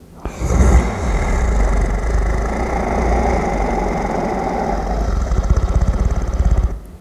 Soft Growl 1
A soft, rumbling creature growl.
beast, beasts, creature, creatures, creepy, growl, growls, horror, monster, scary